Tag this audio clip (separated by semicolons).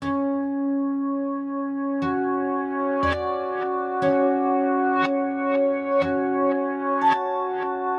drums; melody; hit; loop; synth; samples; loops; music; game; drum